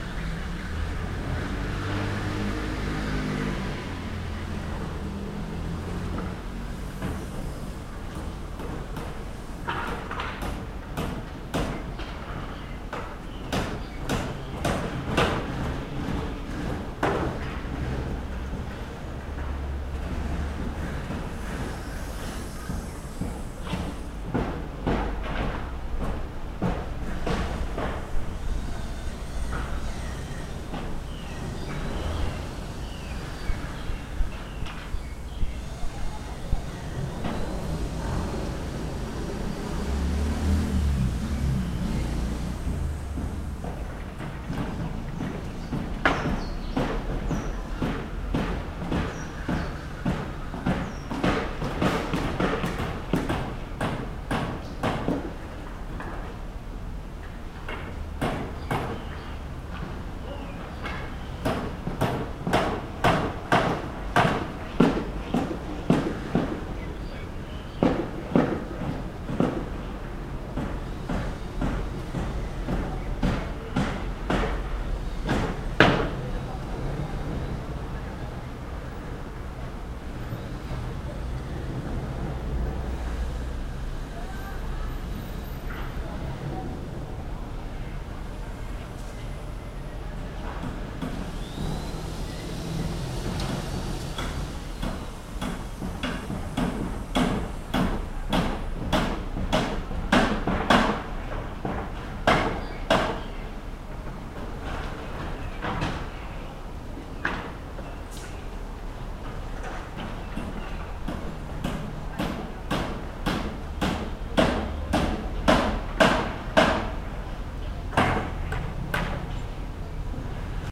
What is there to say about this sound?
This is the sound of a small construction site for a new small apartment.
microphone: Superlux ECM-999
audio interface: Native Instruments Audio Kontrol 1